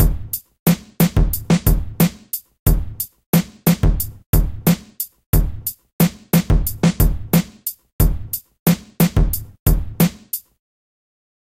Hip-hop
Rap beat with kicks, hi hats and snares.
rap, decent, kick, drum, music, beat, Hip-Hop